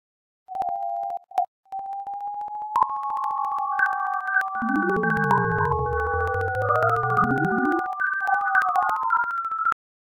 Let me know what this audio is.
Made from a molecular model of Alprazolam with image synth.